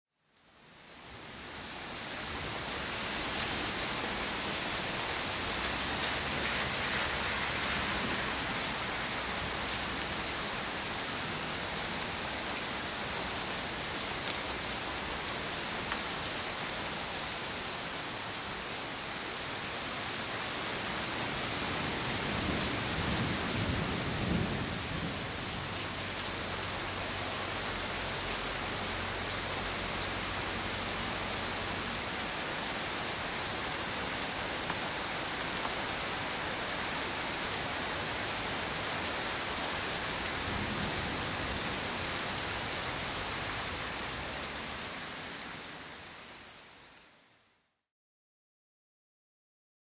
Rainfall in Montreal
Zoom H4N Pro